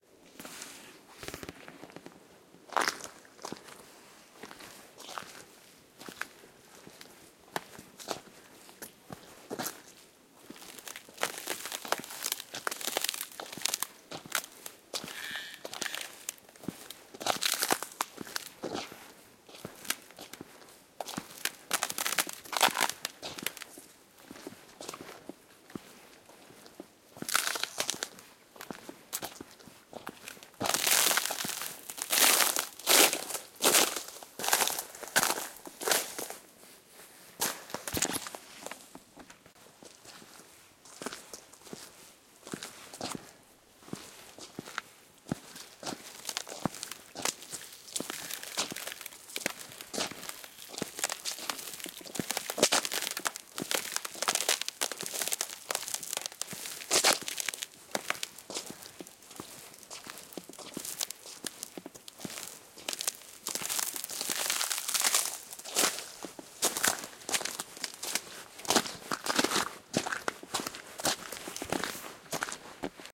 Walking on thin ice
Walking gingerly over thin ice (on big puddle) on hiking trail in LaPine State Park/Oregon. Recorded on Sony NEX-7 mirrorless camera/Feb. 26, 2020.
cracking, crackling, footsteps, ice, winter